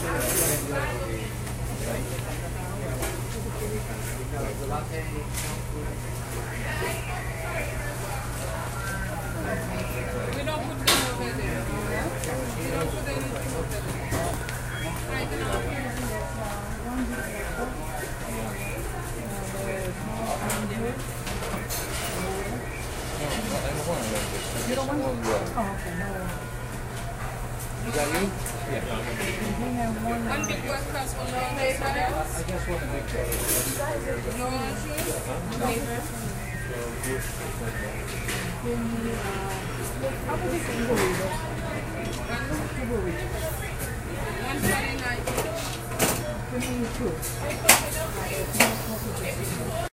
Sounds of the city and suburbs recorded with Olympus DS-40 with Sony ECMDS70P. Inside Mcdonald's for the first and last time in quite a while.
city, field-recording, mcdonalds, restaurant